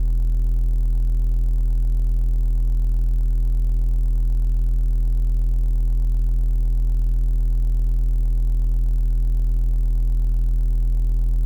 Doepfer A-110-1 VCO Triangle - E1
Sample of the Doepfer A-110-1 triangle output.
Captured using a RME Babyface and Cubase.
A-100, A-110-1, analog, analogue, basic-waveform, electronic, Eurorack, modular, multi-sample, oscillator, raw, sample, synthesizer, triangle, triangle-wave, triangular, VCO, wave, waveform